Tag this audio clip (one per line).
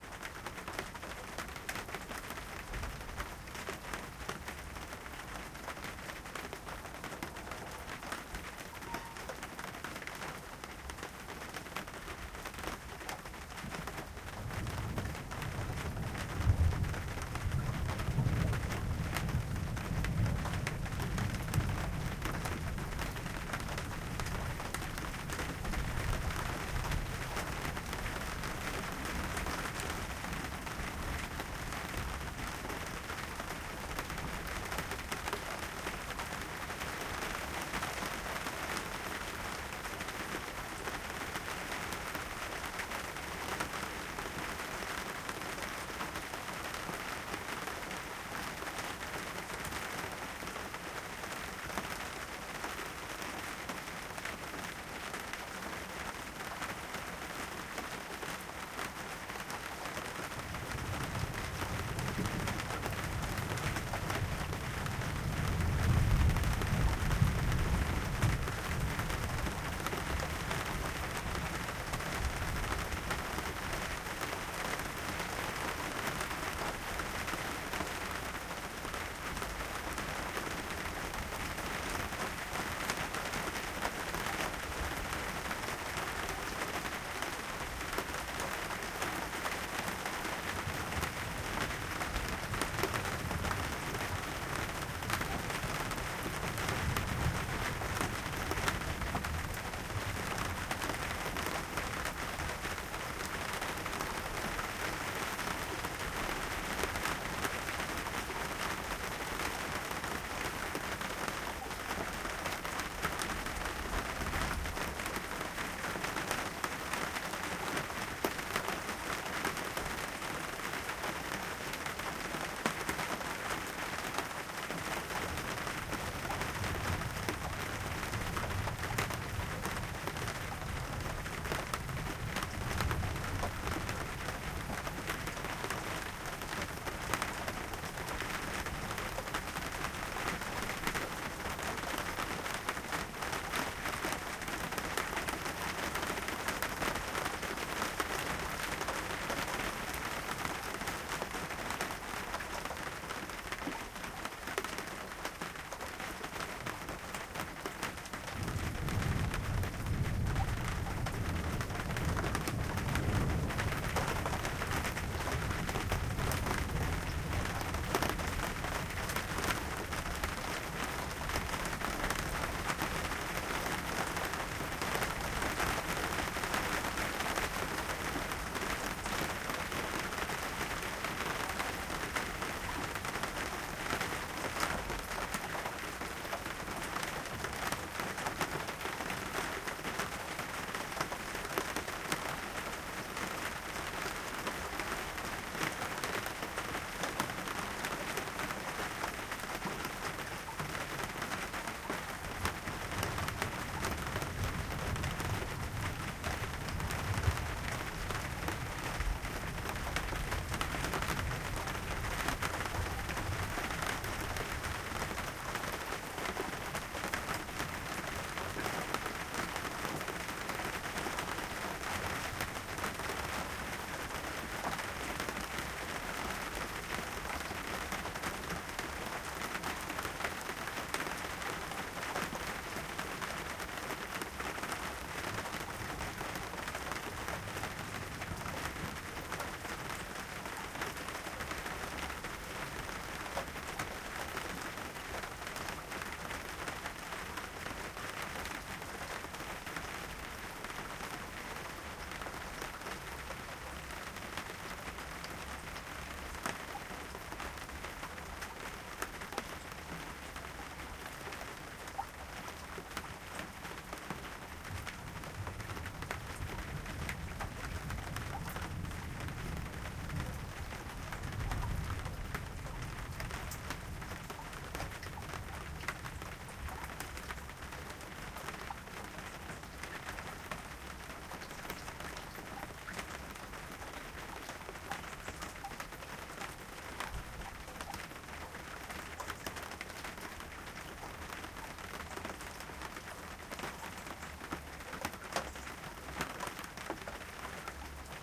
weather
lightning
rain
field-recording
nature
thunder